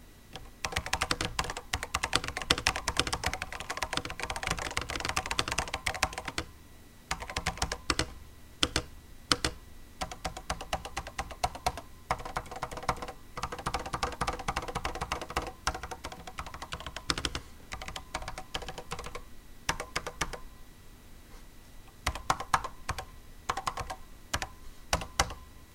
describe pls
Typing sounds from an ALPS made SM-FKB401 "ALPSaver" which uses SKCCBJ switches.